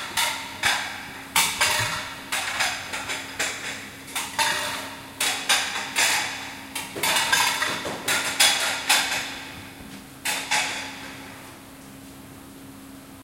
SonicSnap JPPT5 KitchenPlates
Sounds recorded at Colégio João Paulo II school, Braga, Portugal.
kitchen, Portugal